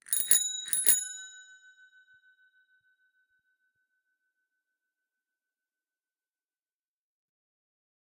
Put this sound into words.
Bike bell 08
Bicycle bell recorded with an Oktava MK 012-01
bike, ring, bell, bicycle